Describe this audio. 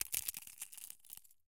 Crushing an egg shell that had been cleaned and dried beforehand.
Recorded with a Tascam DR-40 in the A-B mic position.